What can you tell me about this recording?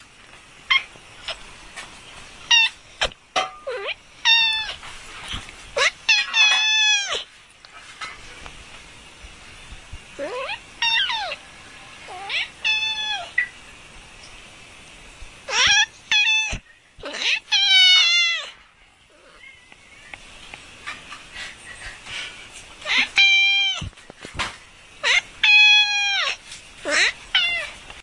24.12.2010: between 15.00 and 20.00. christmas eve preparation sound. my family home in Jelenia Gora (Low Silesia region in south-west Poland).
maiowing cat.